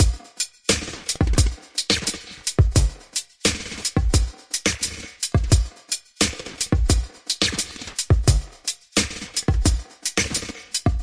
loop drum experiment 87bpm
bruh jdilla moment
Made in Logic Pro with some glitch plugins
abstract, electronic, glitch, jdilla, processed